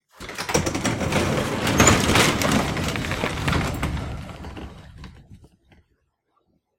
Door-Garage Door-Open-03
This is the sound of a typical garbage door being opened.